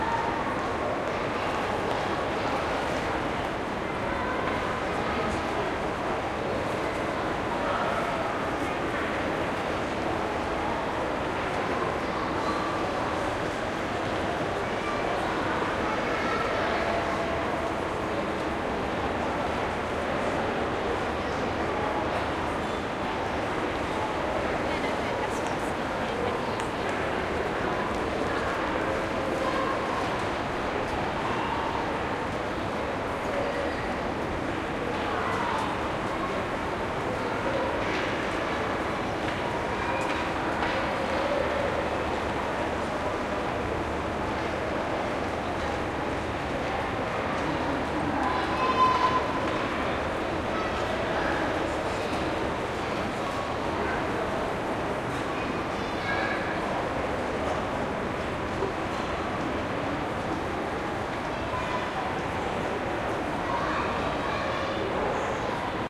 StructureLarge internal ambience - children in distance
airport, ambience, atmosphere, building, crowd, hall, large-building, large-space, mall, murmur, outdoors, people, school, shopping-centre, station